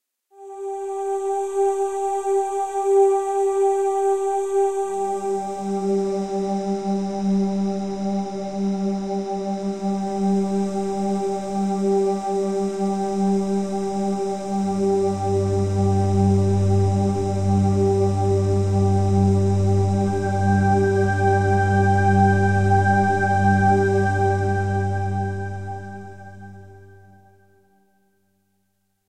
made with vst instruments